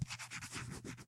Pencil Writing on Paper
Writing on paper with a pencil.
drawing, marker, pen, pencil